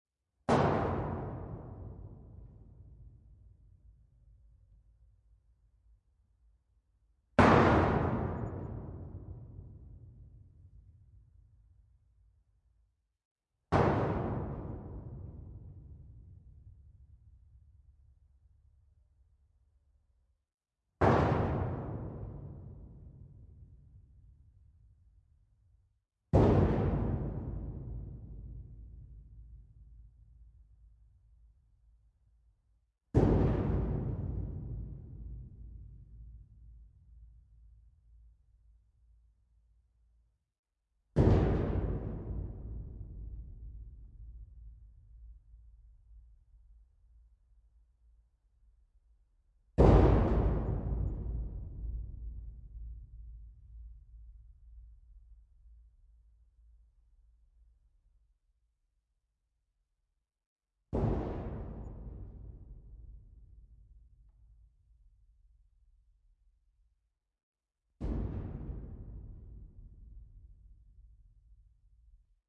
Metal Sheet Cabinet Hit against 50cmx50cmx200cm hollow Part 1
Hit, Sheet, Container